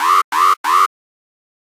2 alarm short c
3 short alarm blasts. Model 2
futuristic, gui